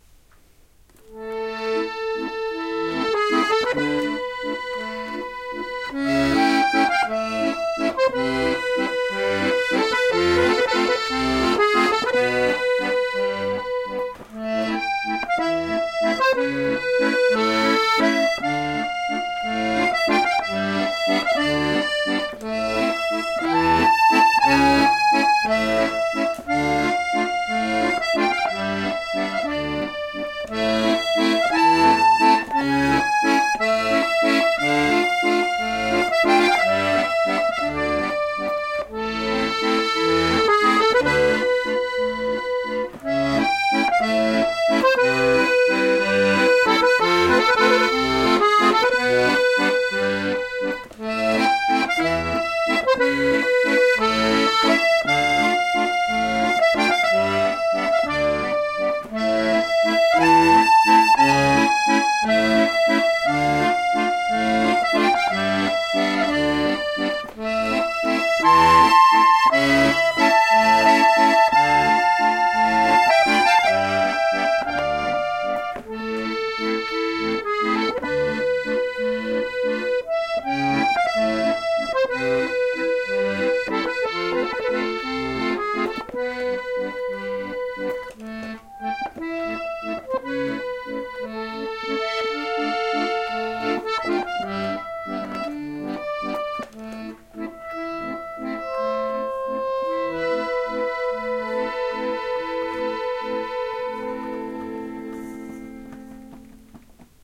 Accordion-music-clean
A melancholy short piece of accordion music.